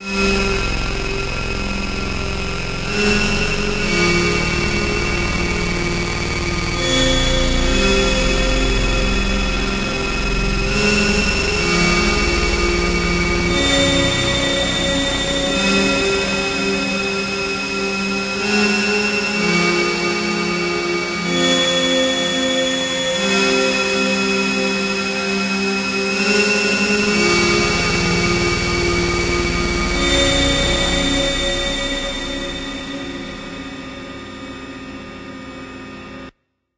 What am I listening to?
Circuit 7 - Synth 2
Synth Loop
124 BPM
Key of F Minor
bass, dance, analog, electronic, psychedelic, digital, beat, portland, experimental, lofi, music, percussion, dark, sample, electronica, industrial, processed, synthesizer, synth, oregon, evolving, noise, ambient, downtempo, hardware, loop